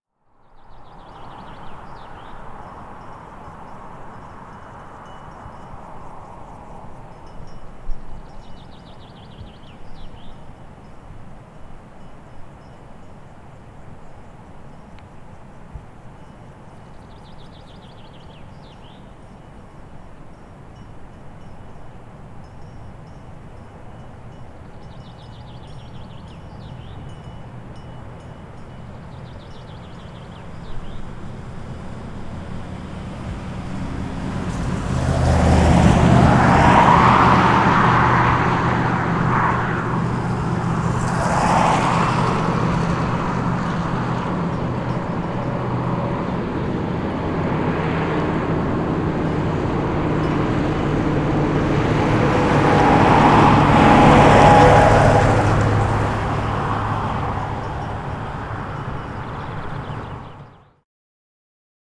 Country Road Ambience Cars
background-sound, agriculture, countryside, farming-land, farming, road, landscape, land, meadow, agricultural, country, estate, farmland, rural